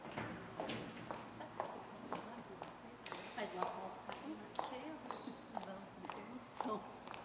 PASSOS GARAGEM 003
passos garagem footsteps garage